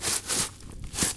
Sound of a foot sliding on seaweed
foot, seaweed, slide